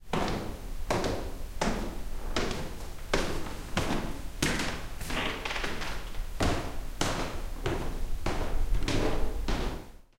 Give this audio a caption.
Go down an old woodn spiral staircase (slow)
Footsteps, spiral, staircase, Stairs